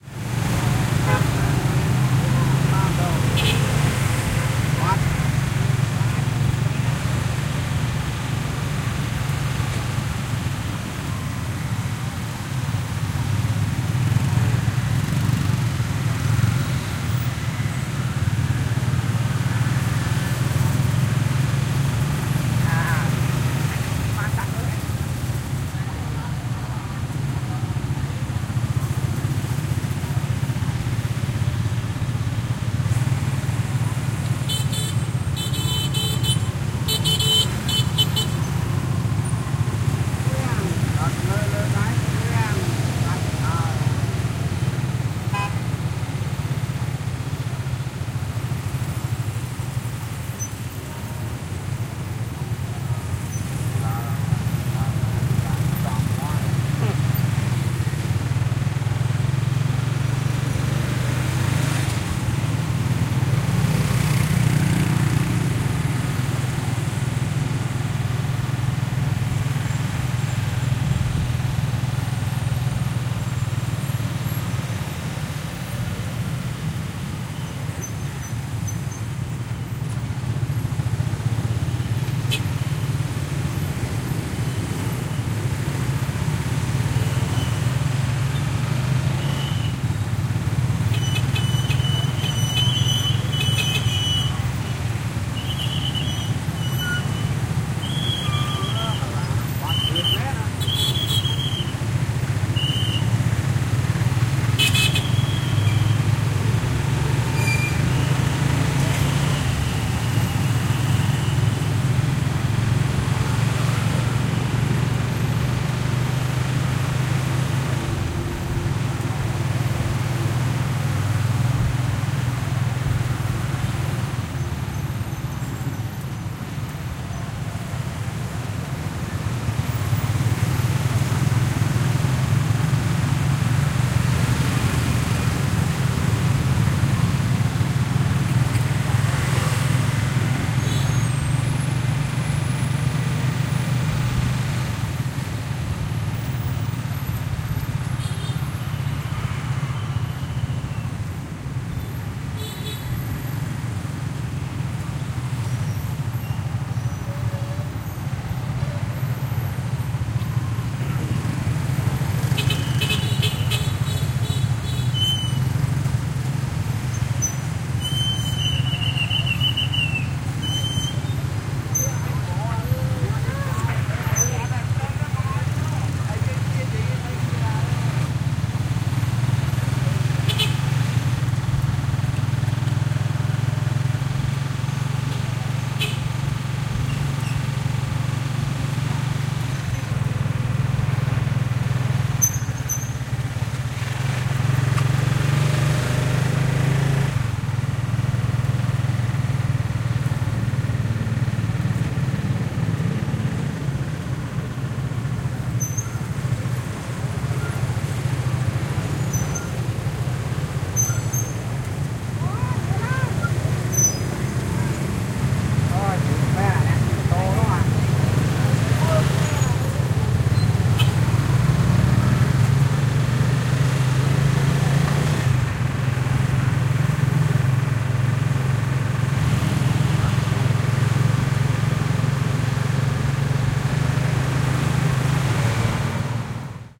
SEA 9 Cambodia, Phnom Penh, TukTuk Ride
Recording of a Tuk Tuk ride through the busy streets of Phnom Penh / Cambodia
Date / Time: 2017, Jan. 05 / 18h33m
cambodia, field-recording, street-noise, phnom-penh, asia, traffic, tuk-tuk